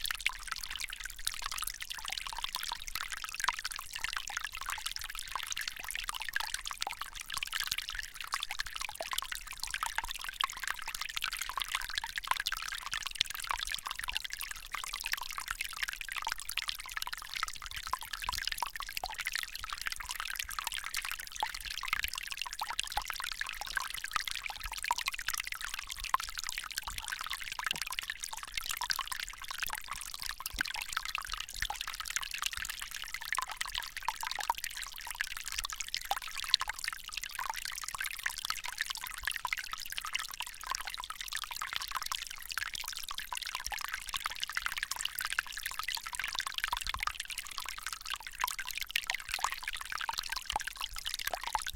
saddle mountain stream
A small stream flowing down some rocks making a pleasant sound.Recorded with Zoom H4 on-board mics.
field-recording, flow, geotagged, liquid, nature, stream, trickle, water